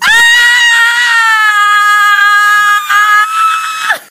high-pitched scream
Scream of woman recorded with an Iphone 5 at University Pompeu Fabra.